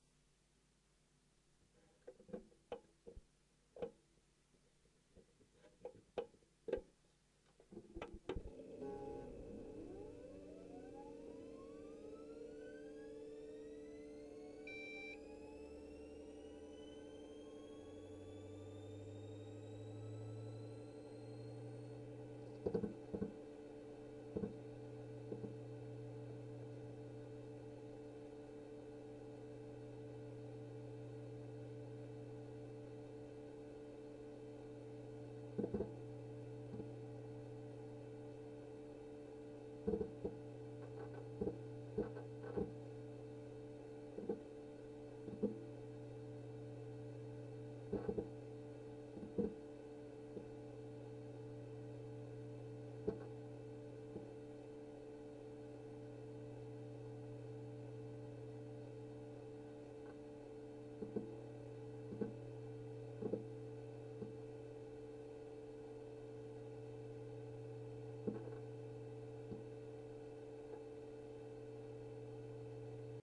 Small server starting up
Small double HD server starts, beep ready, flying arm moving
home, office